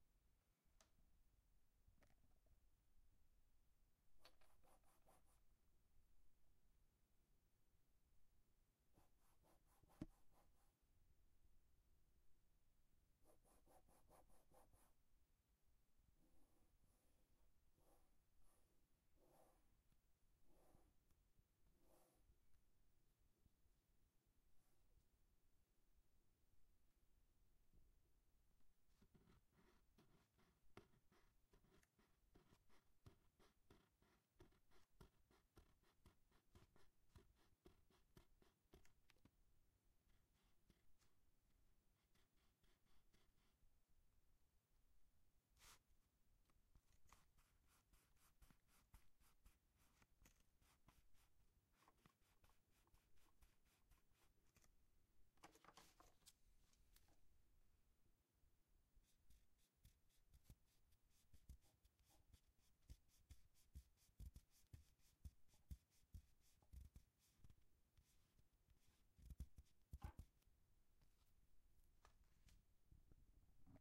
STE-000 eraser
eraser is wiping the pencil line on the paper
eraser, paper, pencil, wipe